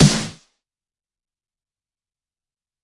glass snare
snare drum made in fl studio. layered 5 snares, added a few compressors and distortions.
breakbeat, breaks, dnb, drum, drumnbass, drumstep, dubstep, jungle, psybreaks, snare